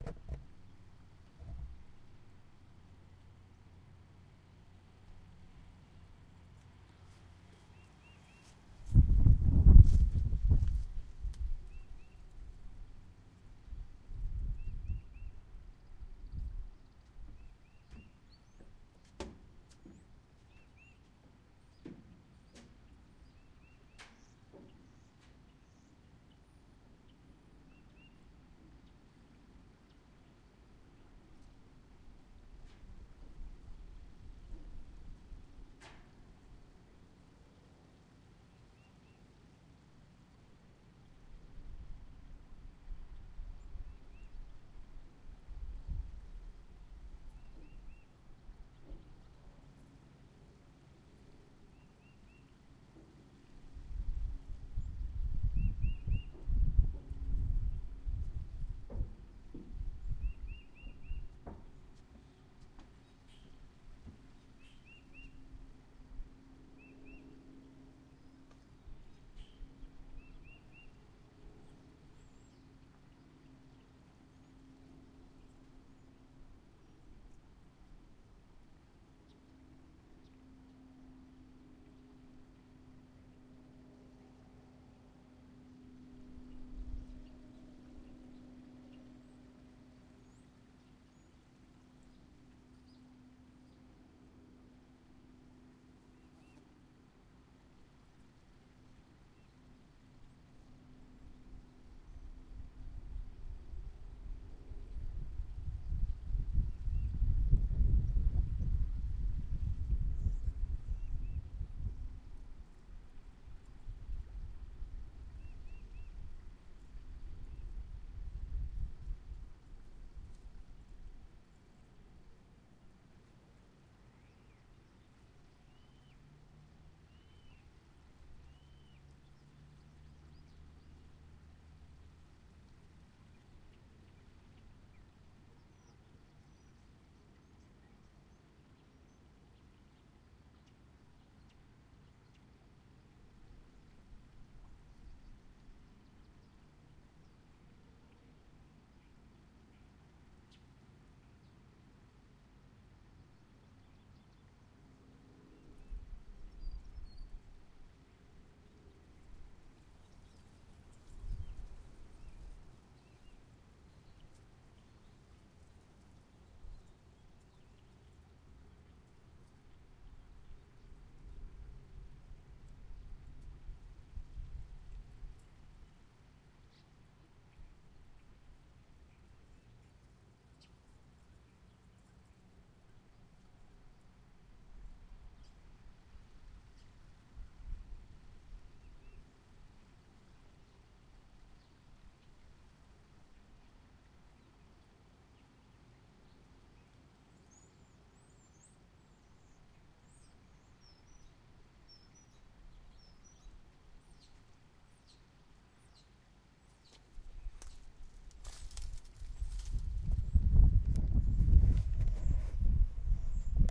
creek+footbridge-SRF
Creek and small creek running under it with man walking over the bridge.